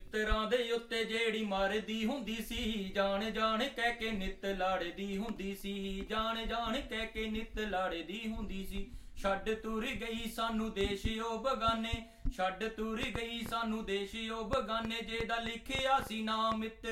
Song in Punjabi. Recorded with a Zoom H1 recorder.